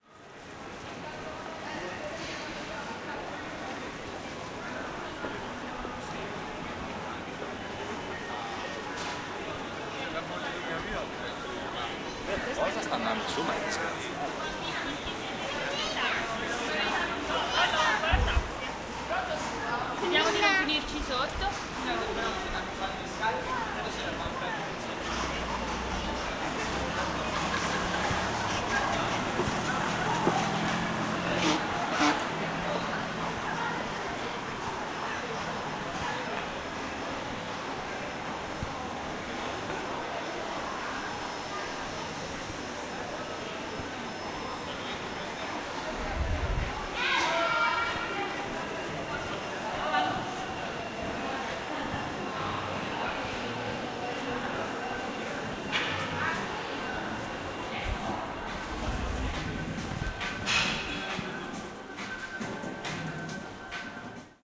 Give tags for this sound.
beep
blow
bus
children
female
field-recording
firenze
florence
loudspeaker
loudspeaker-music
male
music
nose
voice

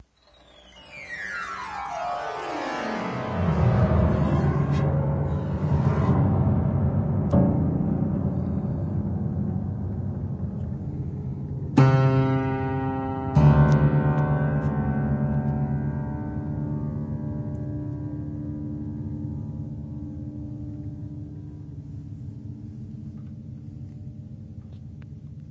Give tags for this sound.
note
upright
strings
old
Chas
single
arpeggio
M
piano
inside
Baltimore
tap
Stieff

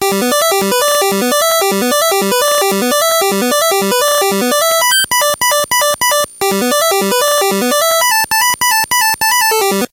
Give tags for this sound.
sounds
nanoloop
melody
little
today
table
big
c64
drums
me
lsdj
my

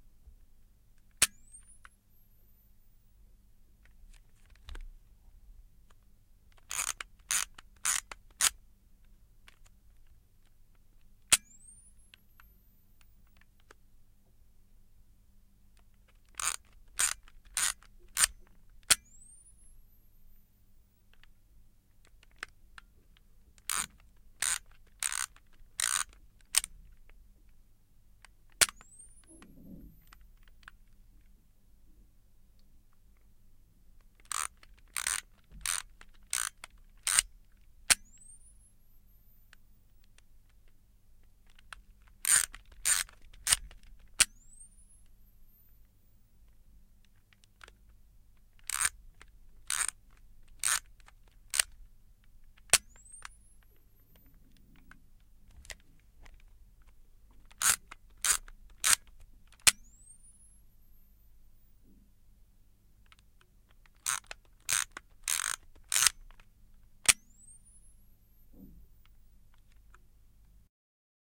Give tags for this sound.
high
lomography
camera
disposable
flash
photography
frequency
charge

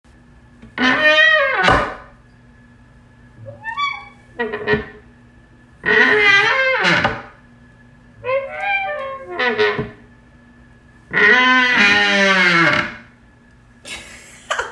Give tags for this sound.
creak
door
hinge
squeaking

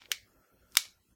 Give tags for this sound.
Plastic
Off
Button
Switch
On
Flashlight

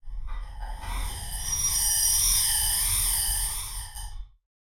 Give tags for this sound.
cave
metal
rock

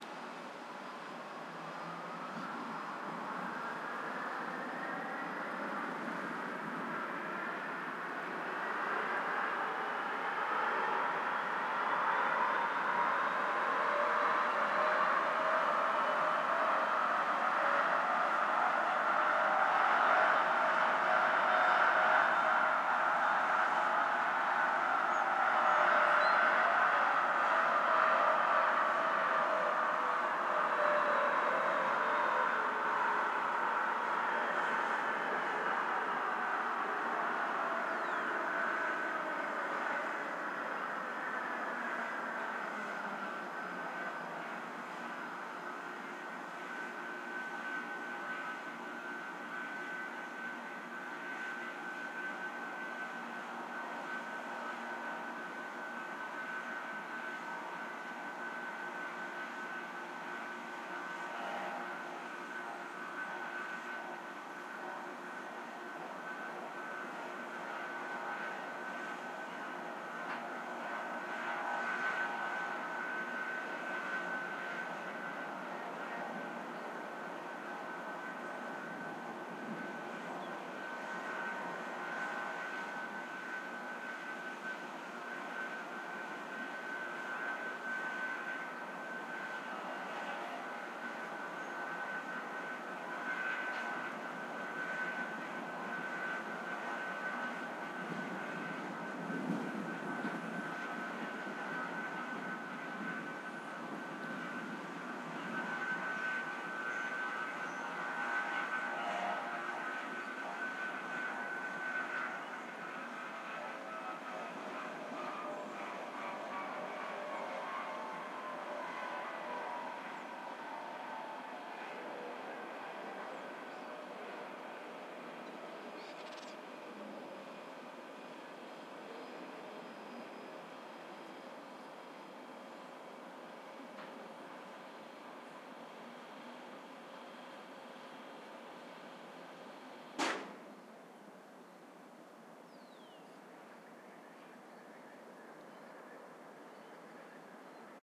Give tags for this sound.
radio-astronomy
radio-telescope
gears
machines
field-recording
satellite-dish
electromechanics
motors
stereo
mt-pleasant-observatory
teloscope
utas-physics
dish

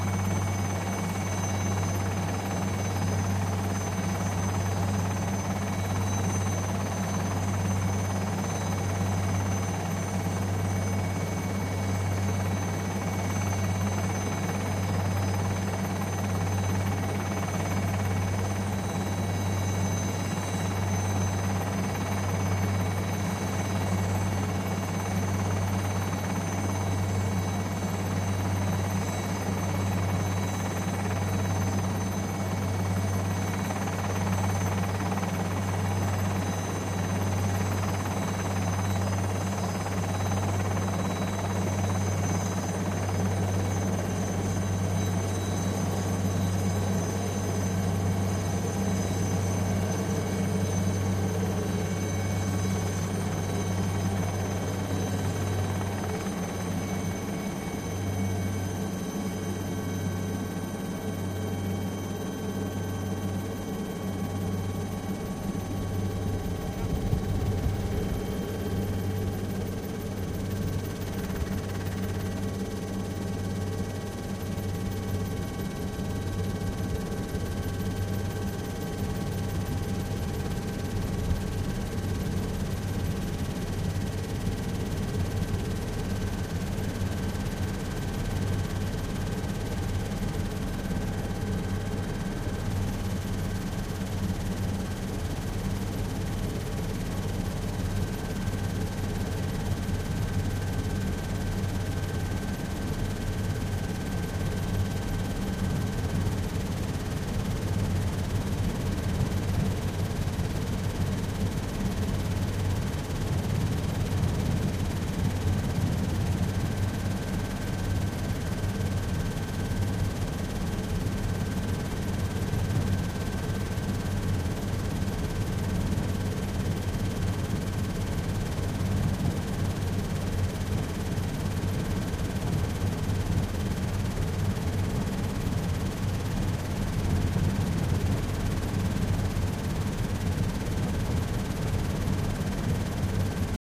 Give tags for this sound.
motor
flying
Helicopter
flight
air
inside
engine

